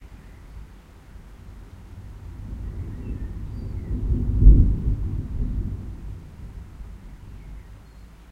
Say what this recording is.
Processed version of thunder recorded with a Rode Stereo Videomic pro.